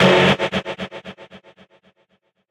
Heavily processed noise